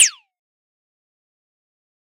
Lazor-Short-Hi2
A cheesy laser gun sound. Generated using Ableton Live's Operator using a pitch envelope and a variety of filtering and LFOs.